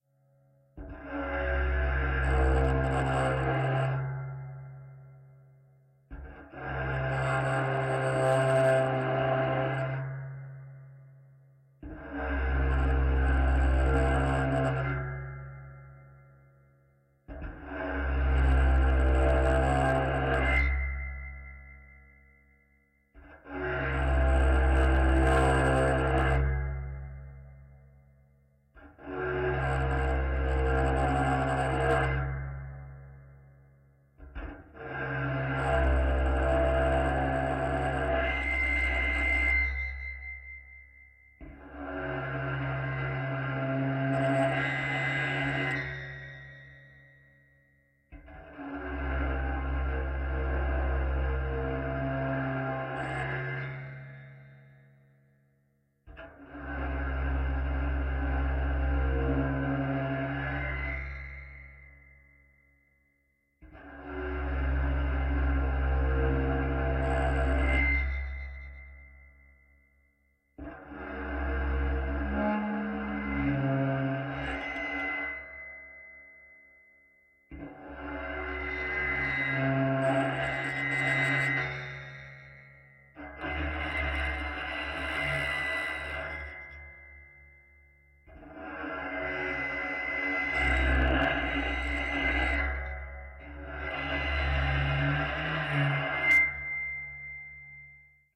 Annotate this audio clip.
The sounds of the springs in a vintage Anglepoise lamp being played by a violin bow. Captured with A JrF contact microphone and the Zoon H5 portable recorder
metal, contact-microphone, contact-mic, piezo, metal-sound-effect, experimental, noise, springs, bowed, metal-springs